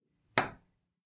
medium drop
an object falling on a table